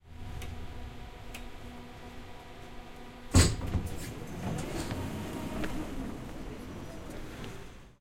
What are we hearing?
INT-oteviranidveri
Noise of trams in the city.